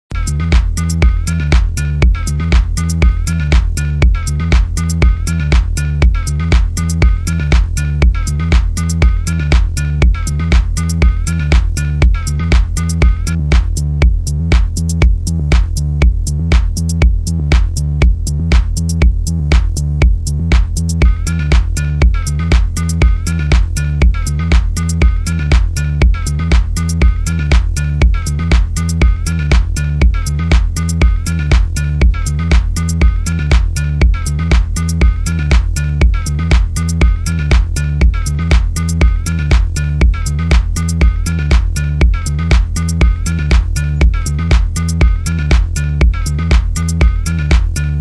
Volca beats house
Korg monologue bass and volca beats
club, dance, electronic, house, korg, loop